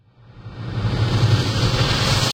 High quality whoosh sound. Ideal for film, TV, amateur production, video games and music.
Named from 00 - 32 (there are just too many to name)
swoosh, swish, whoosh